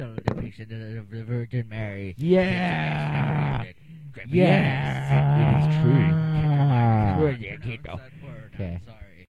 So1 U ombH bm pU pa U a vvvv
samples from a recording of me... its poetry, loll / art, lol.